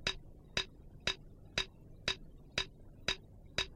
Another click track. Not sure of it's tempo, but am sure that it would be helpful to people.